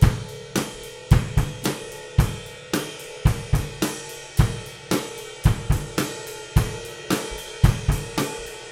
This is a drum pattern played by me for a song. It's a full mix of three microphones - one behind the kit, along with snare and kick drum mics.